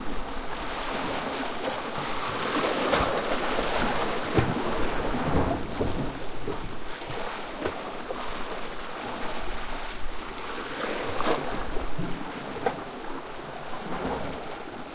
Recording of waves on a calm day. Tascam DR-100